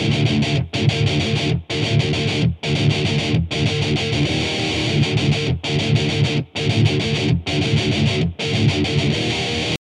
REV LOOPS METAL GUITAR 1
rythum guitar loops heave groove loops
groove, guitar, heavy, metal, rock